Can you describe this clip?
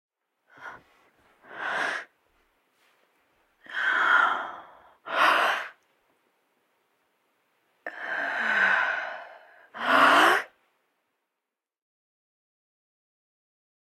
Woman Breathing ASMR 01
Woman breathing heavily
Blue Yeti Pro